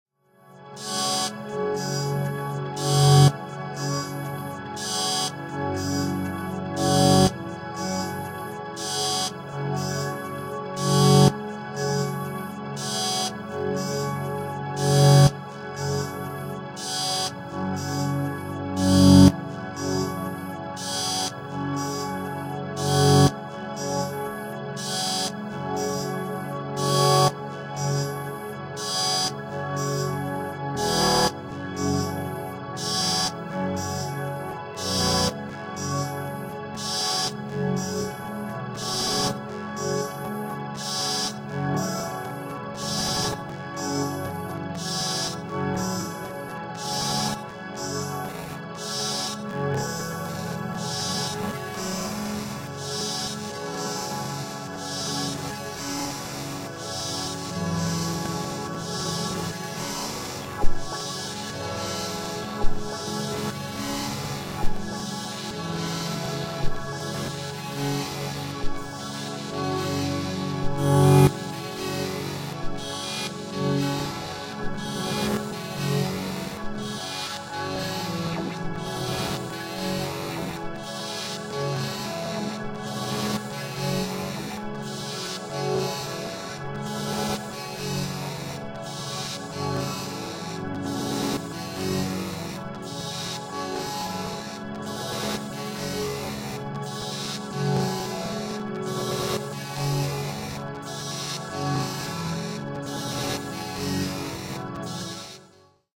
One of four somewhat related sounds, somewhat droning, somewhat glitchy. It's late, I hit record, the red light scares me.
ambient, delay, drone, experimental, glitch